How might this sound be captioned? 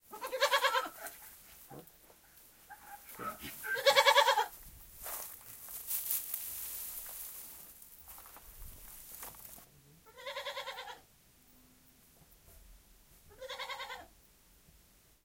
Bleating goat in a closed space, recorded just before she received her meal. Recorded in August 2012 near Banyoles, Spain.
Animals, Bioscience, Campus-Gutenberg, Goat